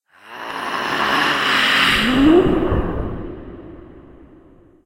Moaning Vanishing Ghost
Some kind of ghost moaning then vanishing in the air. Human voice and breath processed with a binaural simulator plugin and a slight reverb.
whisper, moan, scary, monster, creepy, whining, moaning, binaural, vanishing